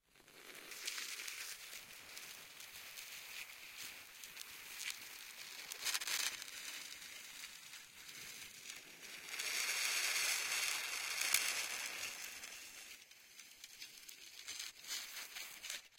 Queneau frot metal 04
prise de son de regle qui frotte